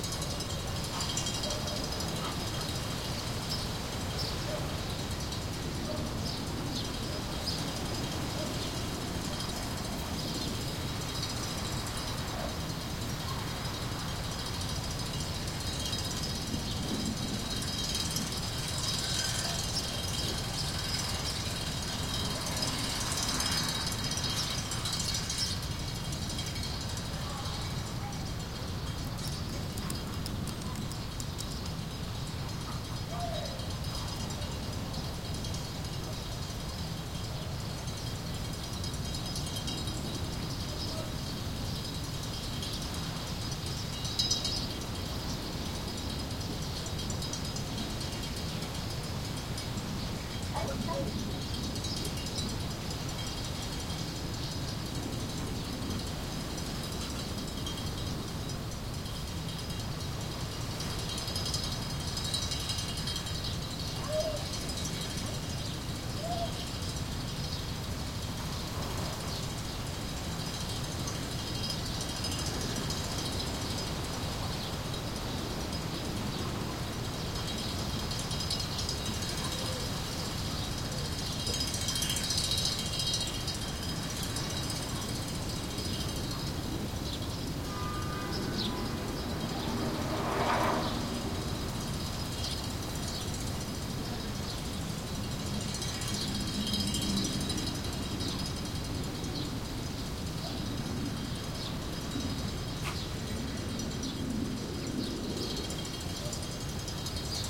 1. Wind Vane Ambience
I'm using the app Randonautica to generate random points on the map and recording them. This recording is perfectly looped :)
Recorded with Olympus LS-100
street, ambience